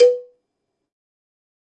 MEDIUM COWBELL OF GOD 037
drum, god, kit, cowbell, pack, more, real